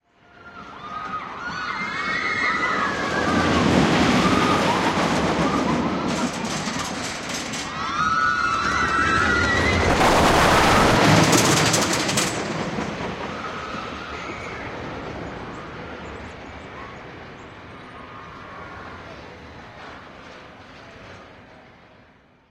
SCB005 Rollercoaster
A small field recording of a roller coaster at Santa Cruz Beach Boardwalk, California USA. Memorial Day Weekend 2010.
ambient, amusement, beach-boardwalk, california, carnival, fair, field-recording, fun, noise, park, people, rides, roller-coaster, santa-cruz, screaming, screams, usa